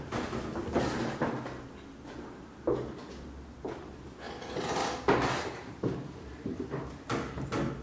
Step in Wood 3
Suspense, Orchestral, Thriller
Orchestral, Suspense, Thriller